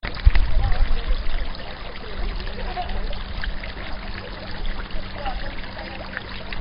Soroll de l'aigua Isra y Xavi
Soroll de l'aigua en moviment d'un llac petit
field-recording, fondo